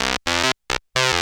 synthlead full2
synthe string done with a damaged Korg Polysix
korg, polysix, synthe